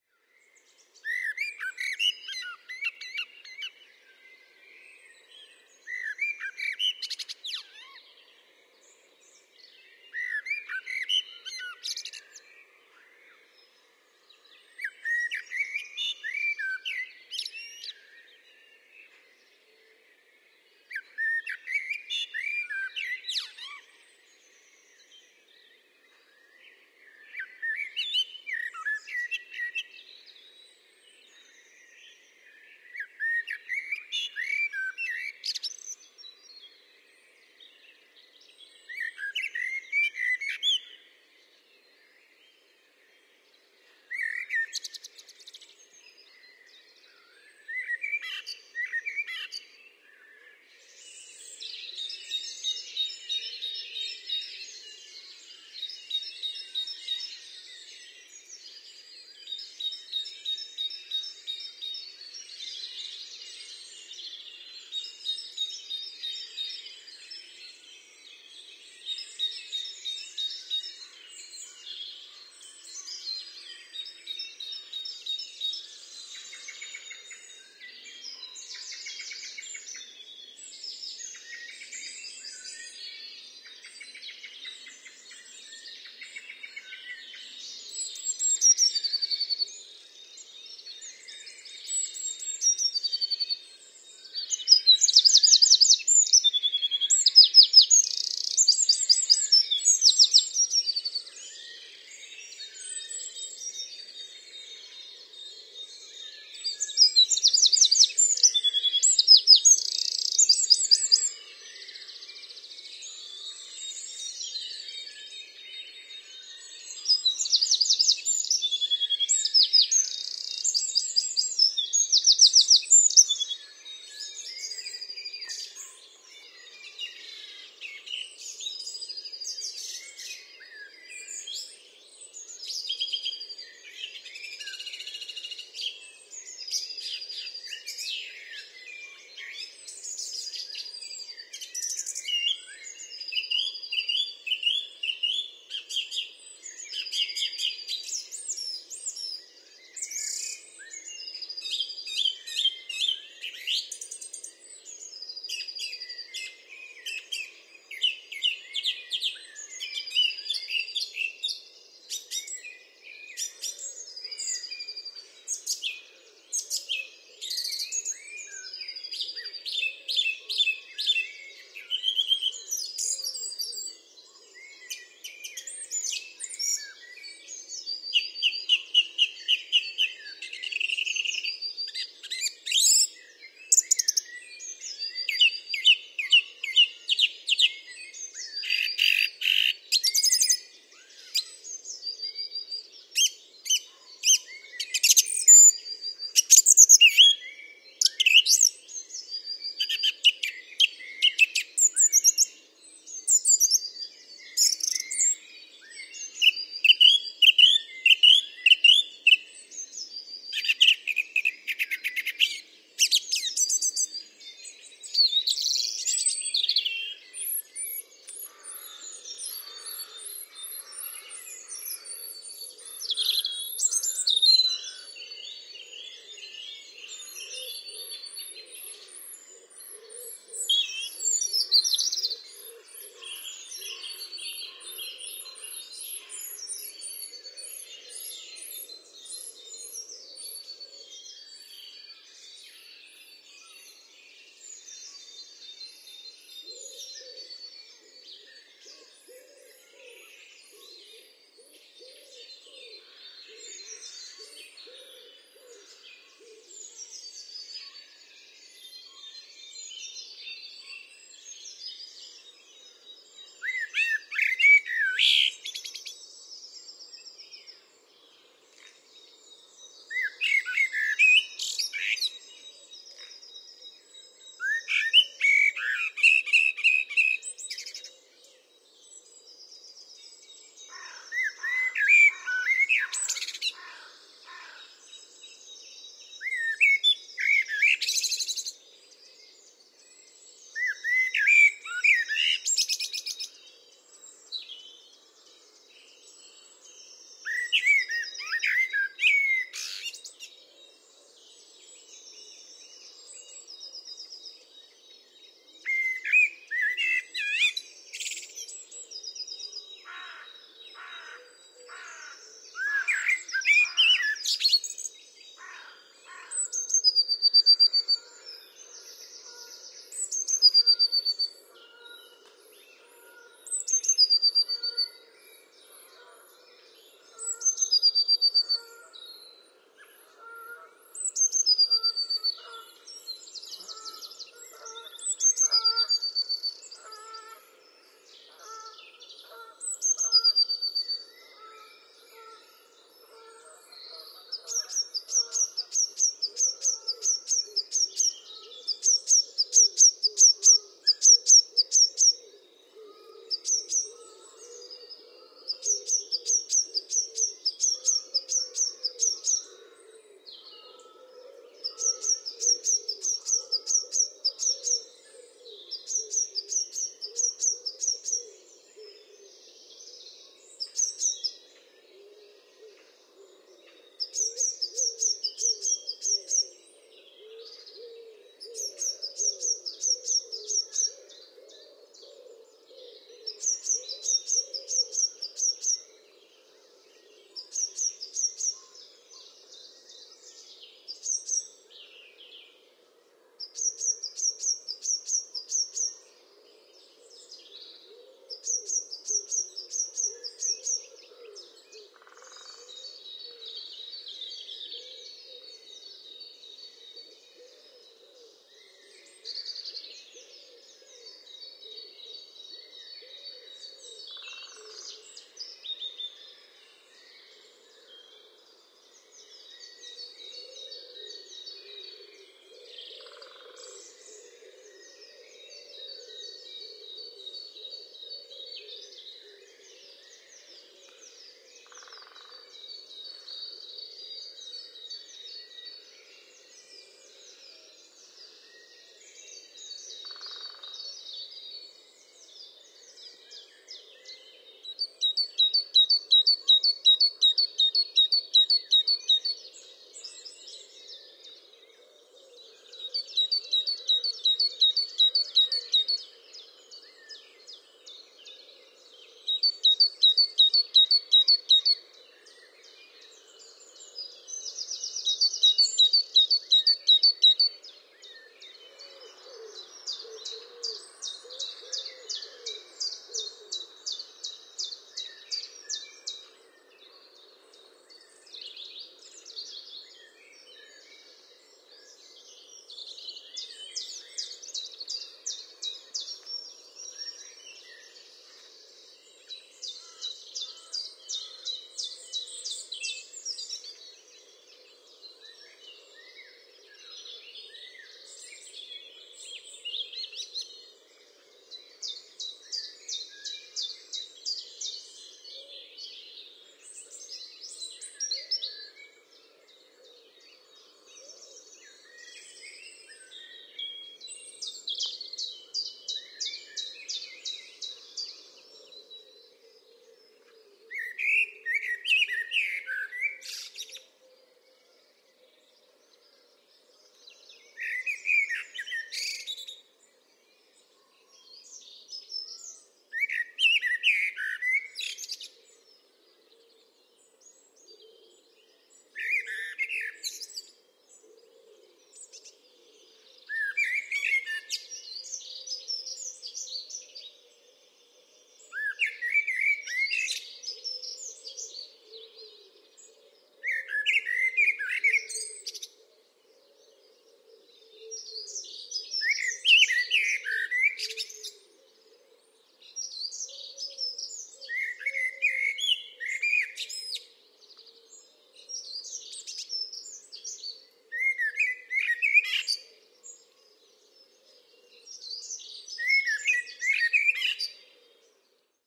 Recorded on a walk close to my home in Essex, UK (furthest distance from home was just under a kilometre). This involved walking through a housing estate then along an unmade path bordered by trees, bushes and close to a meadow.
Birds that can be heard include the following with approximate timings (this list is not exhaustive).
0.00 Blackbird
0.51 Great Tit
1.16 Blackbird Alarm Call
1.30 Blue Tit
1.36 Wren
2.12 Song Thrush
3.37 Robin
4.10 Wood Pigeon and Great Tit
4.25 Blackbird
5.17 Blue Tit
6.42 Great Spotted Woodpecker
7.26 Great Tit
7.52 Chiffchaff
8.41 Blackbird
Other birds that can be heard include pheasant, carrion crow, dunnock, Canada geese in flight and a peacock.
Recorded with parabolic mic attached to a Zoom F6 on 3rd April, 2022.